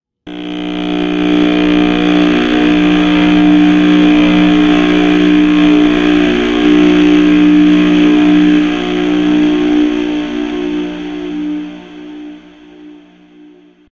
SFX drone cafetera
Sonido de cafetera digital en funcionamiento, en un estado estacionario, aprovechando lo momentos de baja intensidad. Se proceso con un ecualizador, aumentando el contenido en baja frecuencia del sonido, además, se añadió una reverberación para modificar la percepción espacio-temporal. En este caso se optó por agregar al sonido capturado una reverberación no tan profunda para darle espacialidad al sonido y por medio del EQ de protools se le añadió más presencia de grabes, para reforzar el concepto de drones implementado por medio de este sonido estacionario.
electrodomesticos, cafetera, Sounds, space, drone, SFX, artificial, cocina, ambient